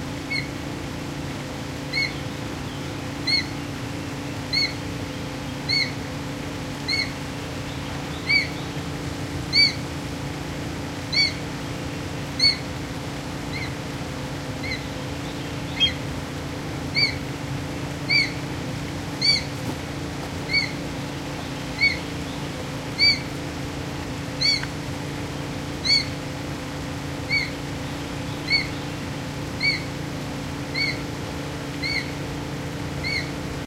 ornate hawk eagle
Calls from an Ornate Hawk-eagle. Some hum in the background, not from the recorder. Recorded with a Zoom H2.